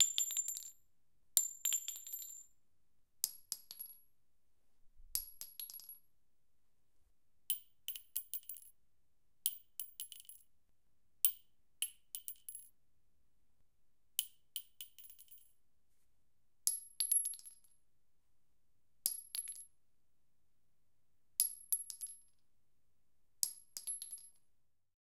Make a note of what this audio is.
bullet shells - single - consolidated
Dropping single bullet shells onto concrete from a height of 25cm.
Recorded with a Tascam DR-40 in the A-B microphone position.
ammunition, bullet, bullet-shell, clink, ding, gun, metal, metallic, shell, shells